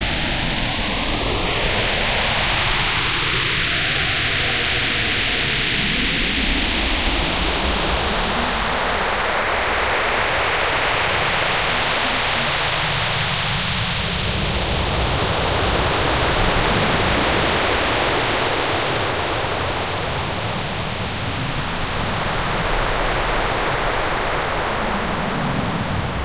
Erika's Foot 2
Dragon, electronic, Erika, noise, Stampy, StampyDragon, VirtualANS